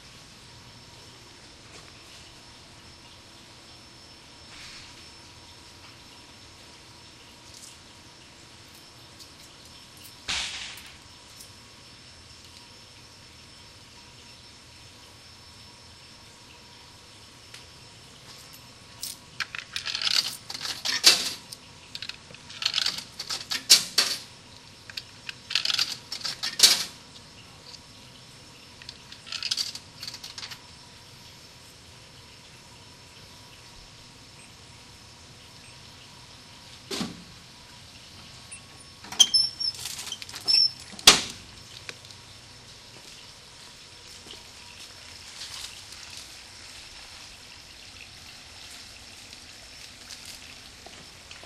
ER Vendingmachine
Vending machine sequence in outdoor "lounge" at the hospital emergency room recorded with DS-40.